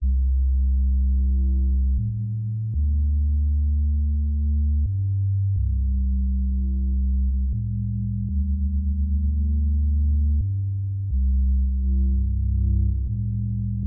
Melodic Ambience Loop
ambient, drone, drone-loop, loop, melodic